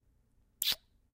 NPX Male Kiss 1
kissing, foley